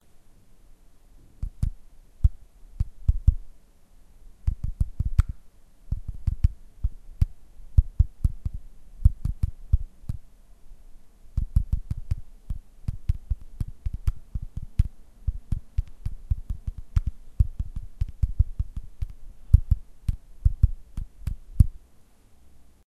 typing on a smartphone
Typing with a finger on a smartphone.
display, iphone, smartphone, touching, touchpad, typing